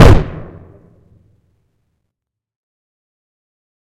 Not a real recording: this is synthetic sound created to sound something like a large gun firing. No, I haven't been around real artillery to know what it should sound like, so this isn't intended to mimic reality. Created in Cool Edit Pro. This one is still deep but not as much so as ArtilleryFireSynthetic.
blast, synthetic, gun